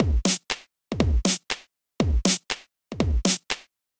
120 bpm kick snare thumper double claps offbeat
electro, snare, dance, house, drum, hip, 120bpm, kick, electronic, loop, hop, drums, edm, beat, trance, techno, drumloop